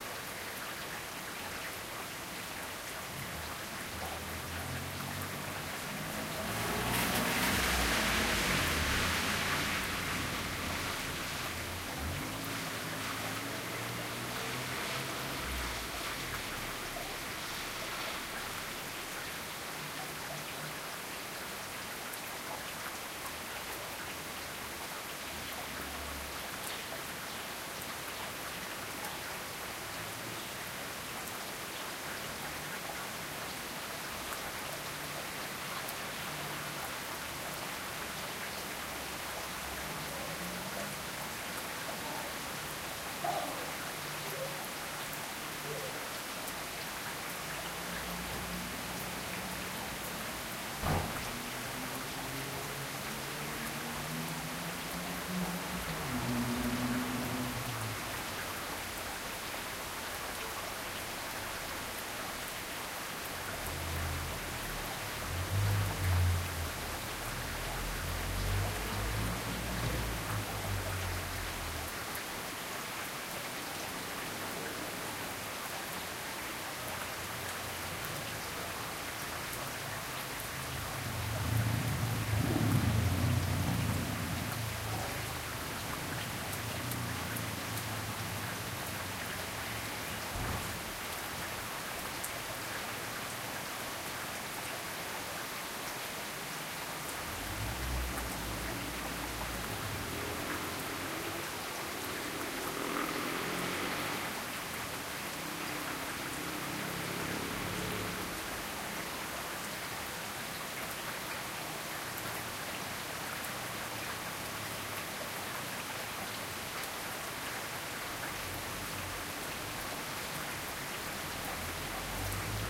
2 minutes record of rain. Used a TASCAM DR-05 digital audio recorder.